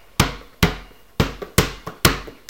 hija balon 2.5Seg 15
Bouncing, bounce, ball